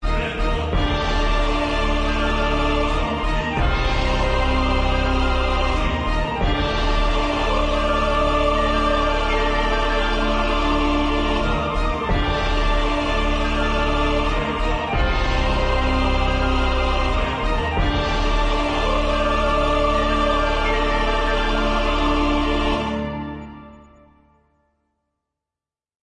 Genre: Epic Orchestra
This one is totally messed up on mixing and not gonna finish, cuz I don't wanna blow my ears.